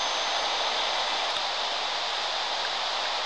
The background static from a radio transmission using a toy walkie-talkie.